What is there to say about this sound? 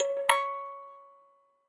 metal cracktoy crank-toy toy childs-toy musicbox